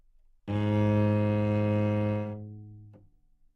Part of the Good-sounds dataset of monophonic instrumental sounds.
instrument::cello
note::G#
octave::2
midi note::32
good-sounds-id::4273